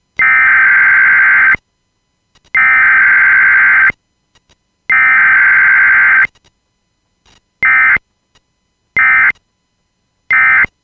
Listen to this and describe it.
This tone represents the hidden code for: tsunami watch
Alert, Emergency, Tsunami, Watch